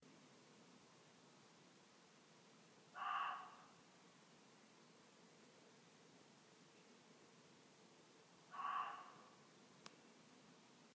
Just a deer barking